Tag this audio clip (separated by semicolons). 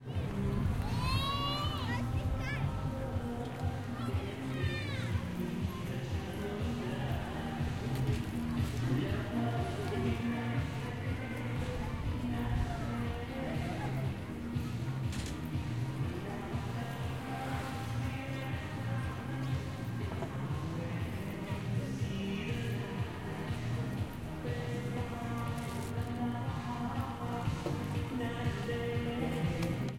field-recording; park; ambient